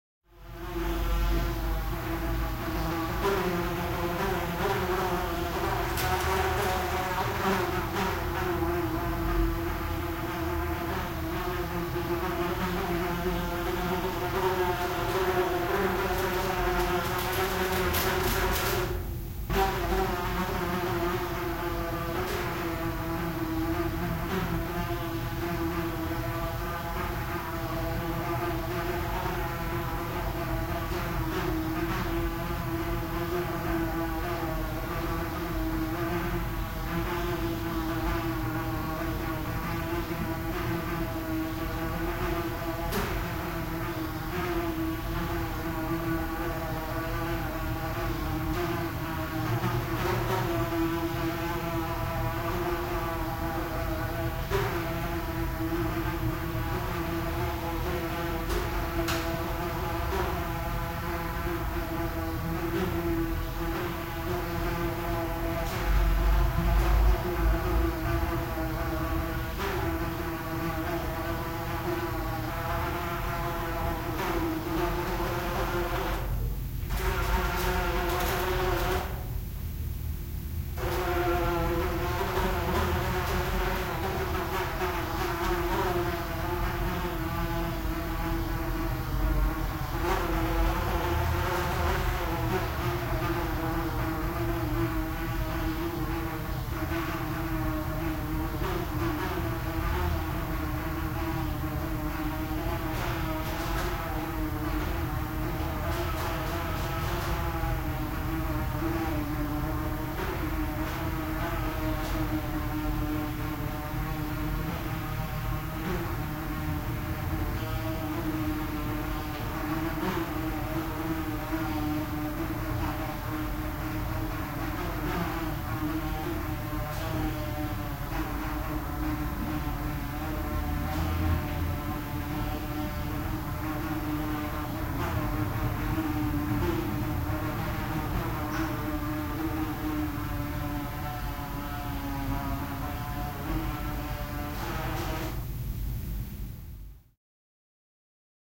Fly in a bathroom // Kärpänen kylpyhuoneessa
Fly flying in an echoing room, sometimes hitting the wall. Air conditing hums.
Kärpänen lentelee kaikuvassa huoneessa, osuu välillä seinään. Ilmastoinnin huminaa.
Paikka/Place: Suomi / Finland / Nummela
Aika/Date: 30.08.2003